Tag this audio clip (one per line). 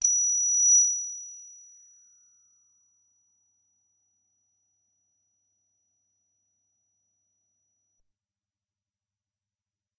multisample
single-note
G8
midi-note-115
synthetizer
analogue
deckardsdream
synth
cs80
ddrm
midi-velocity-16